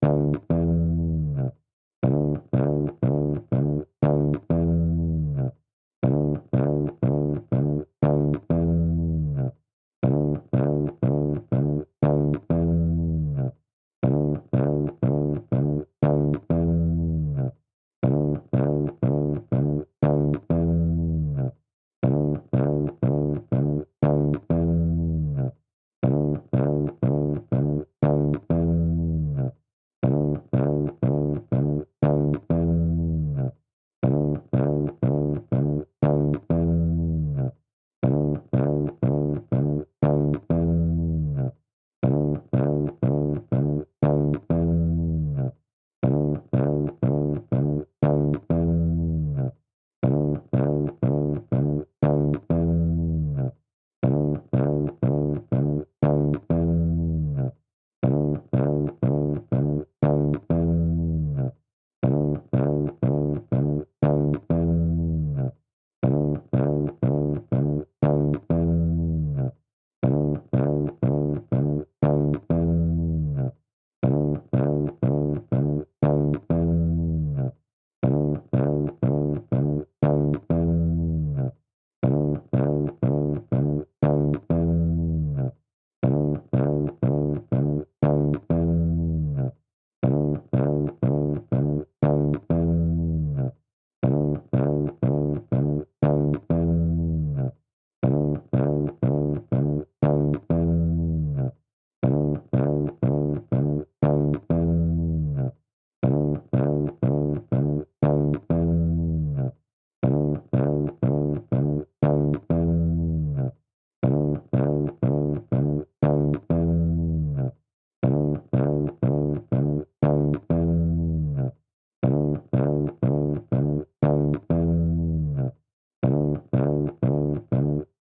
Bass loops 079 long loop 120 bpm
onlybass, bass, hop, percs, 120bpm, dance, 120, drums, rhythm, groove, loops, bpm, drum, hip, drum-loop, loop, beat, funky, groovy